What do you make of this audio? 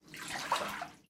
water flicks splash 167
drip, water, drop, splash